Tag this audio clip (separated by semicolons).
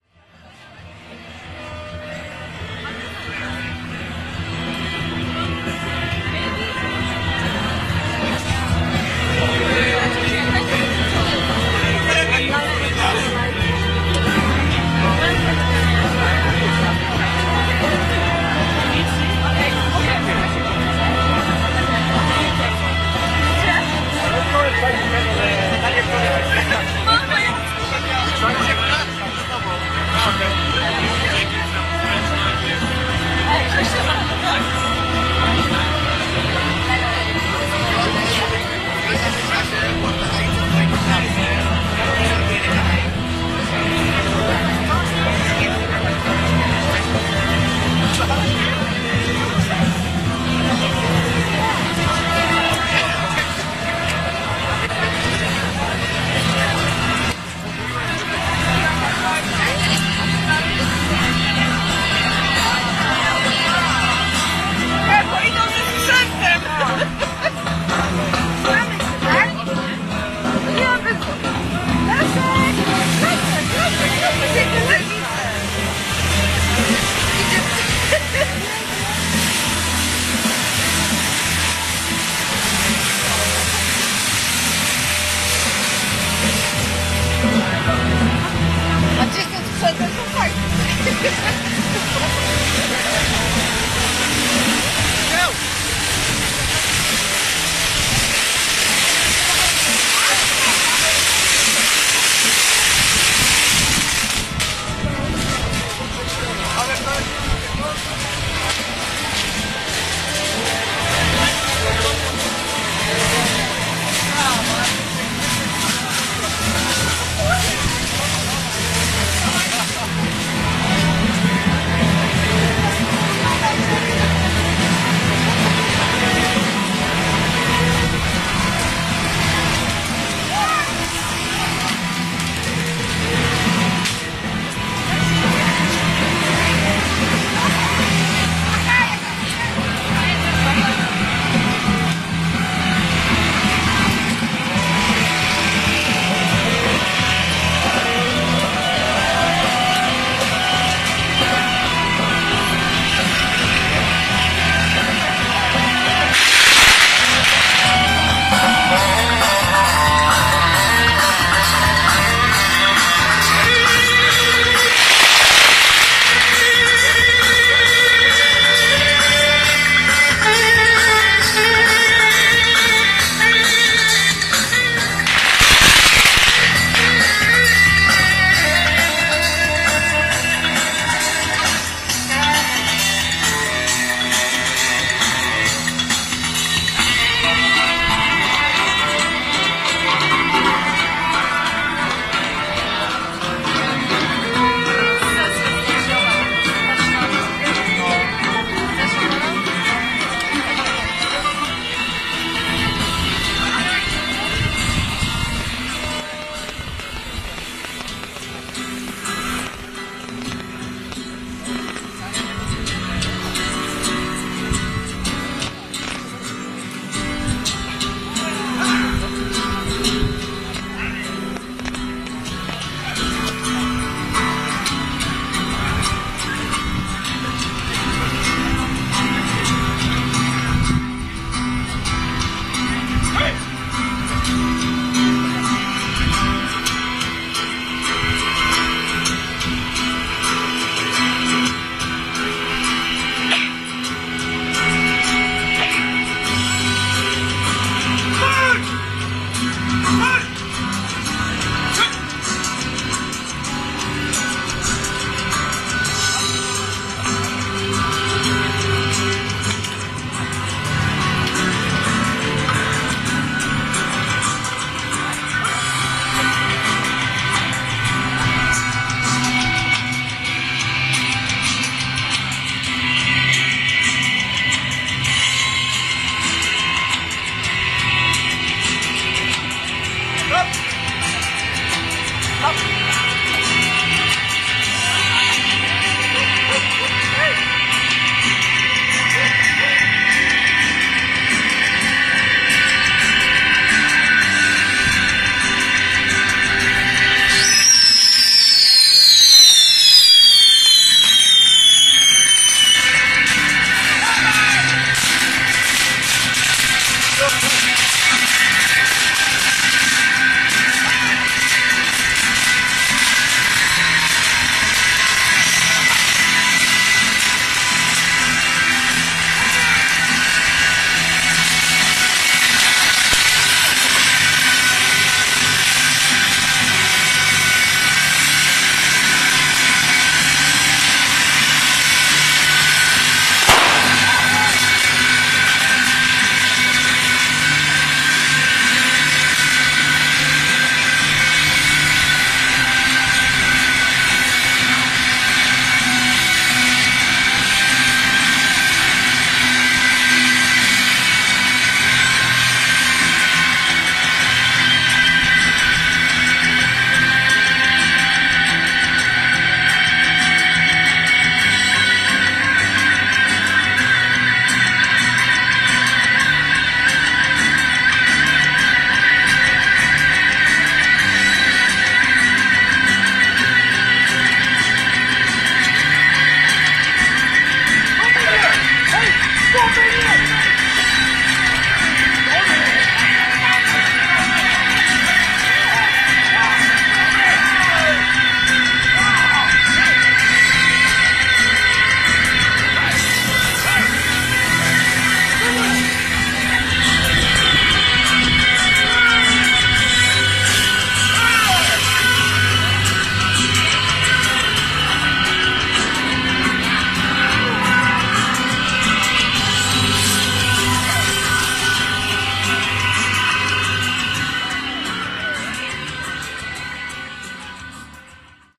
crowds; festival; fireworks; karnavires; malta; performance; poland; poznan; street; theatre